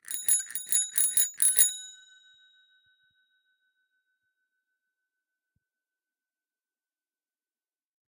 Bike bell 11
Bicycle bell recorded with an Oktava MK 012-01